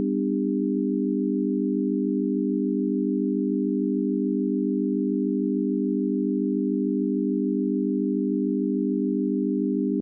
base+0o--4-chord--22--CEGC--100-100-100-70
test signal chord pythagorean ratio